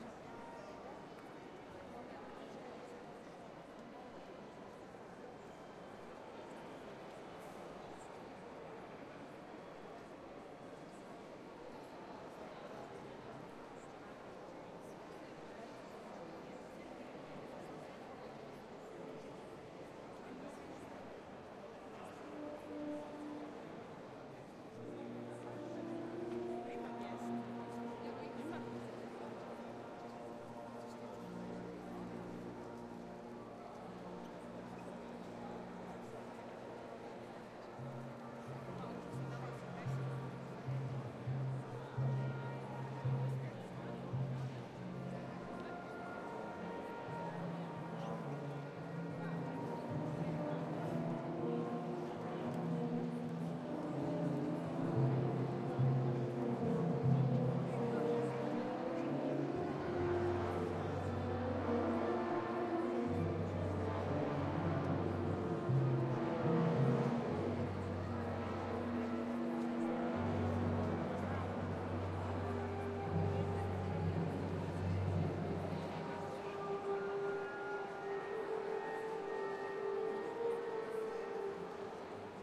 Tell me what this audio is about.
KapucinskaCrkvaZabica Prelude
orchestra tune-in before the concert begin in church, the reverberation is about 4.5s and people are coming in and talking
reverb, zabica